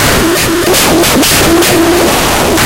DR Ruiner loop 1
roland, drum, dr-550, bent, samples, a, machine, circuit, one-hit, loop